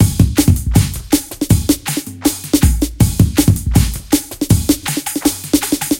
Tortoise vs. Hare 160bpm
A fidgety Drum and Bass loop with a cowbell, a bunch of claps, shakers and tambourines, arranged in FL Studio, run through FL Studio's Gross Beat plugin, which was set to 1/2 speed slow, and this is what the original and the processed drumloop sound like combined.
beat, break, loop, percussion, rhythm, upbeat